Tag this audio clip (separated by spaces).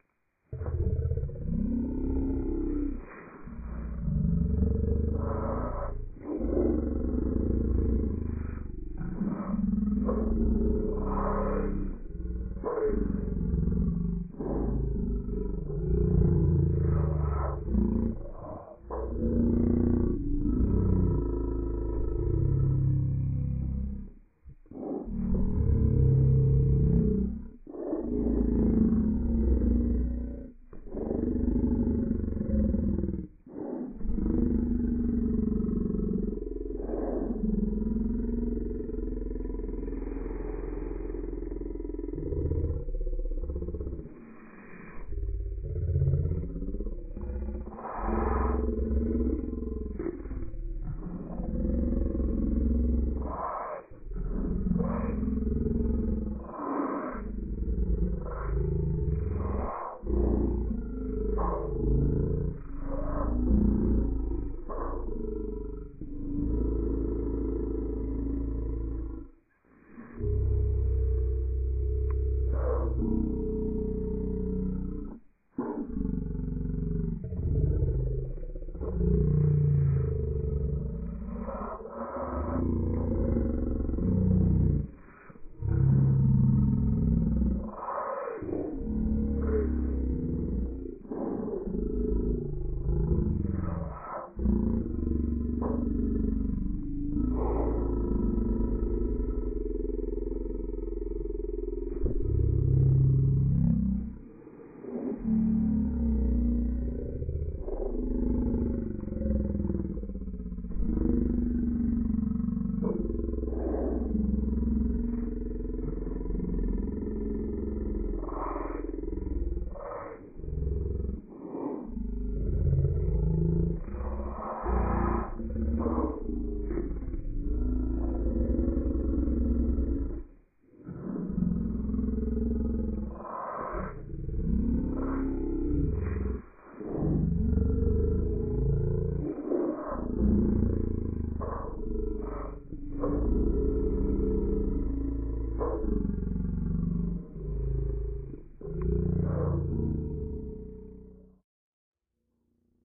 disease horror sound vocal zombie